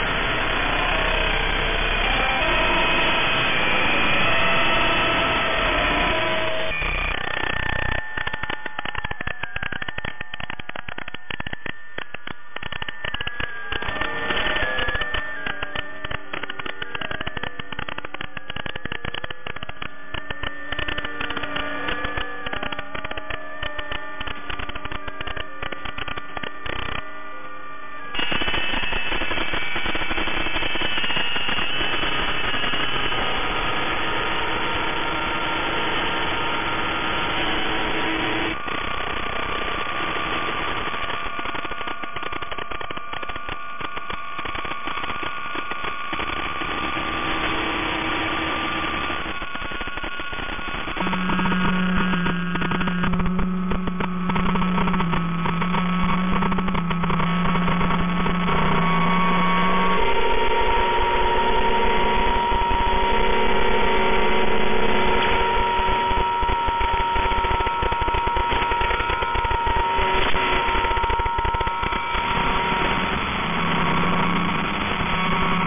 Made using the online remote shortwave receiver of University of twente in Enschede Holland:
Made in the part of the 20-meter ham band where PSK31 is the dominant digital mode, with the receiver deliberately mistuned, in FM mode at it's widest setting to get a mishmash heterodyning sound.